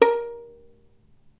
violin pizz non vib A#3
violin pizzicato "non vibrato"
violin, pizzicato, non-vibrato